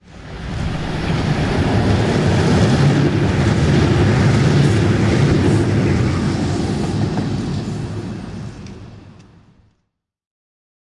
A passing tram
town, city, tram, tramway, transport